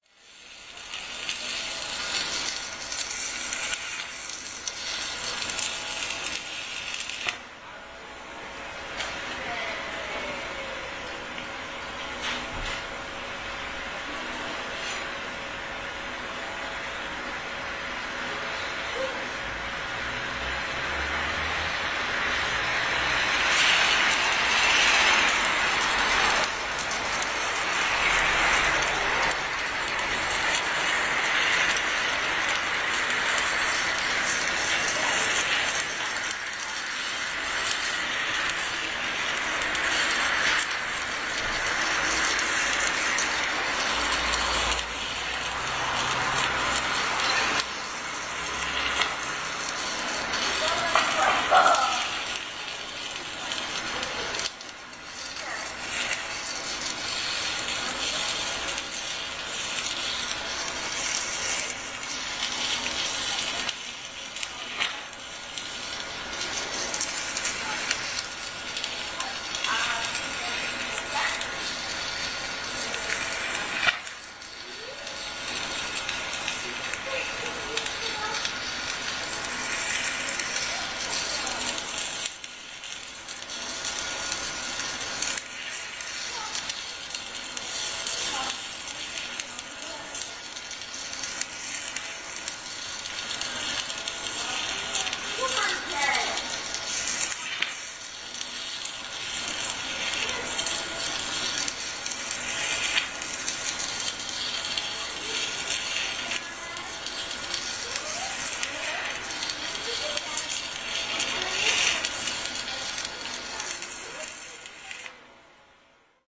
300710welding tram line1
30.07.2010: between 1.00 and 2.00 at night. Gorna Wilda street. two workers are repairing the tram line. the sound produced by the welder. in the background: buzzing of the generator, my neighbours voices (windows were opened, people couldn't sleep), passing by cars.